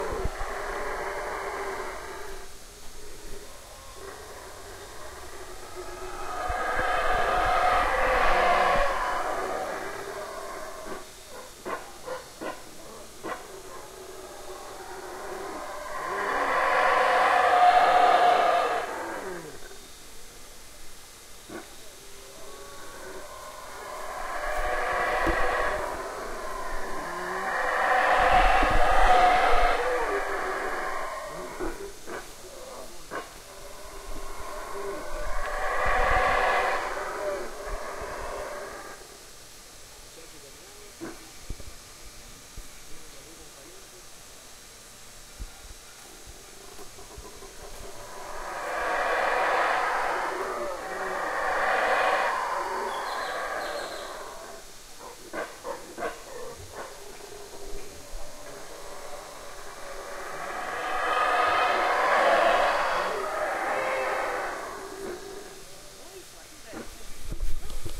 Howler monkeys recorded in the jungle in Polenque, Chiapas, Mexico. Sorry but I haven't processed these files at all. Some of these are with the mics in a 90degree X-Y config and some in a 120degree X-Y config.